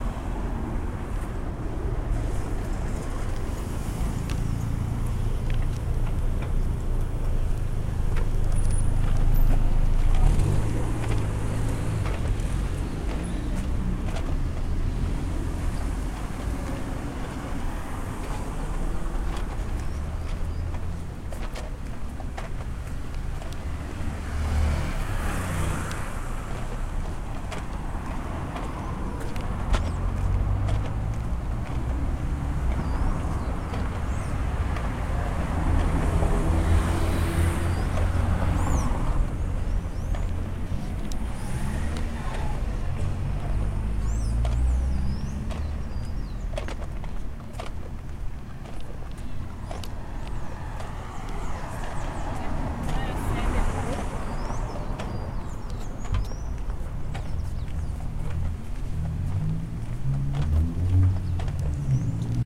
Walking on street - Traffic + Random Birds (Sao Paulo)
Traffic noises walking on street with some random birds chipping. Recorded with Tascam DR-03
soundscape, field-recording, ambience, traffic, street, birds, city